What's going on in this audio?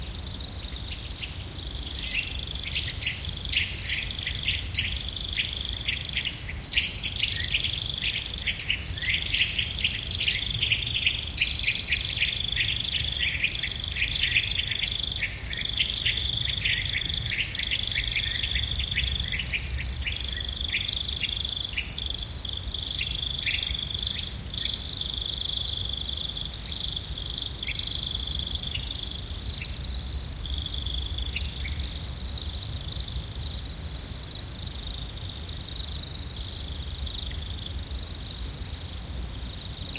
Jungle, Tropical birds and insects
A recording of birds and insects. Sounds like a warm tropical jungle. Not recorded in a real jungle, but it does sound like one!
nature, summer